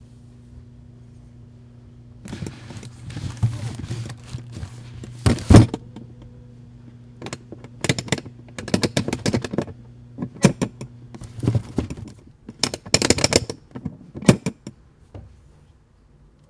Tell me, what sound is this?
locked box
Banging open a trunk locked by metal lock